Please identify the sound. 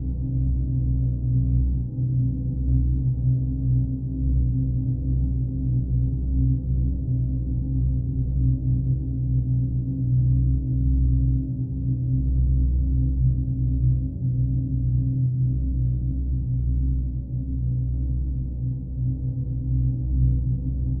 Dark Ambience 001
Loopable Dark Ambient Sound. Created using granular synthesis in Cubase 7.